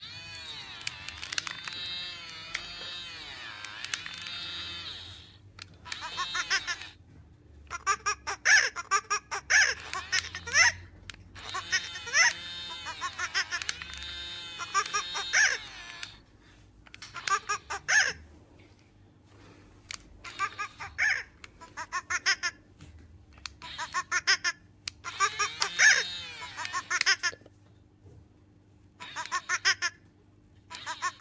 03 Furreal Friends Monkey
This is a recording of a Furreal Friends toy monkey. It was recorded at home using a Studio Projects C1.
furreal-friends, monkey, toy